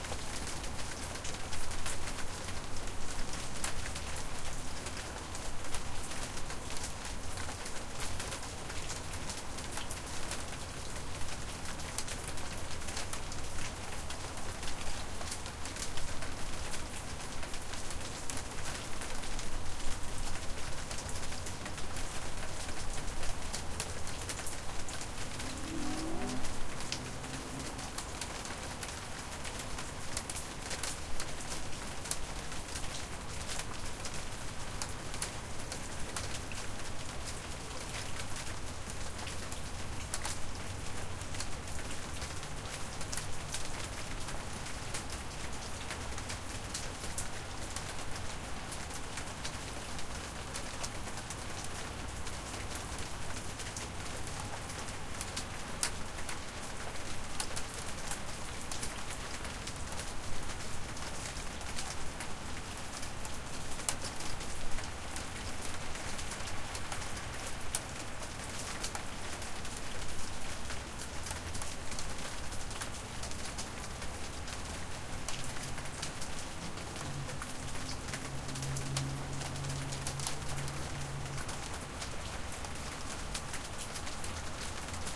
Rain on the porch
Rain falling on the porch. There is some city traffic noise present in the audio.